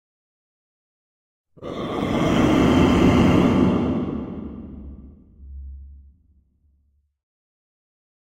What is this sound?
Monster growl Reverb
Here is the same monster growl but with reverb applied using Avid Space in Protools for a monster growl to sound as if it is in a cave.
If you use this I would for you to send me your work!
Growl, Monster, Roar, Creature